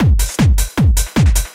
hard dance loop